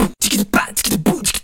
A processed beatbox